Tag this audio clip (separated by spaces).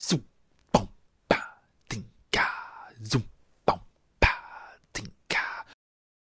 beat beatbox bfj2 dare-19 loop